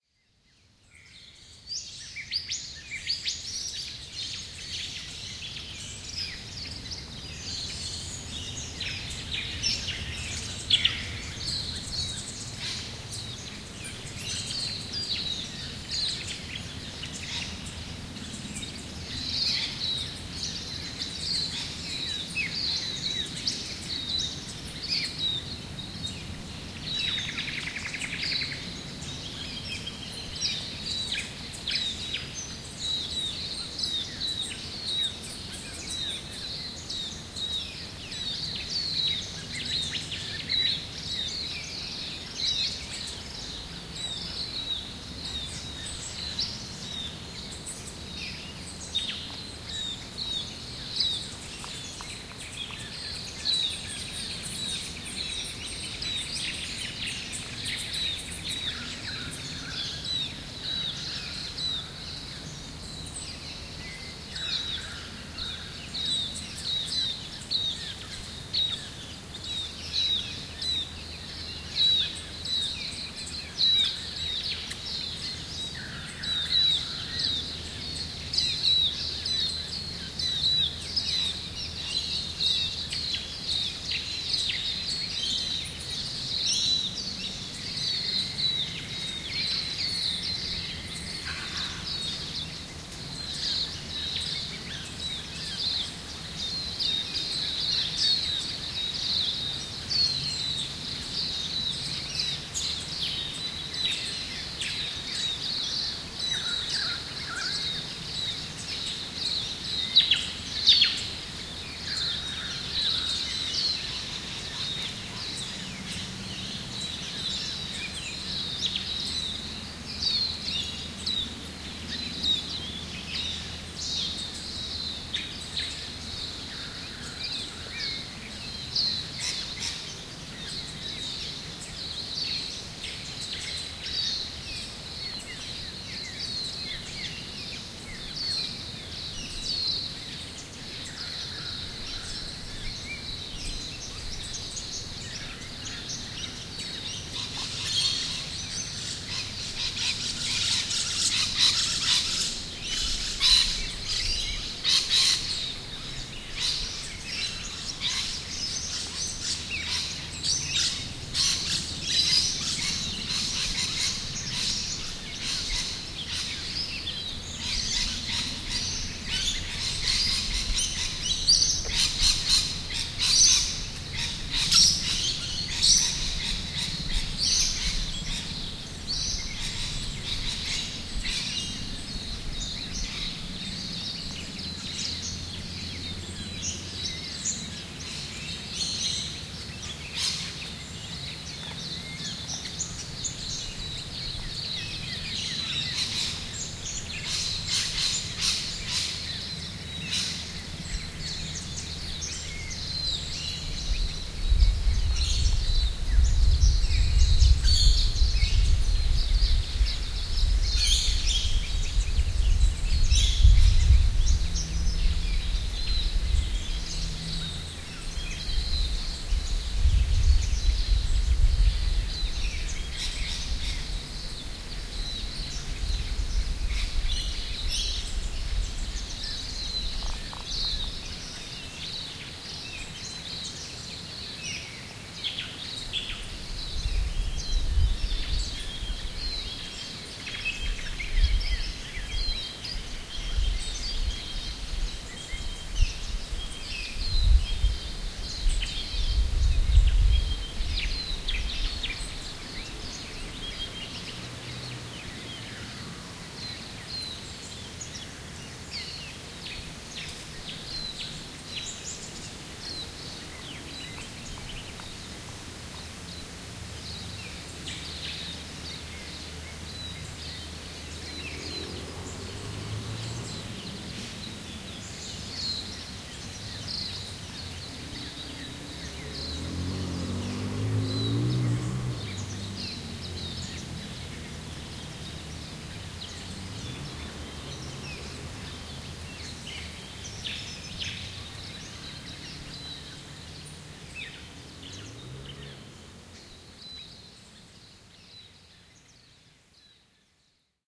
Amphitheatre Morning
Recording of birds at a natural amphitheatre in the Australian bush. Bundanon Estate, New South Wales Australia.
new-south-wales, australian-bush, field-recording, nature, ambiance, australia, forest, birds, autumn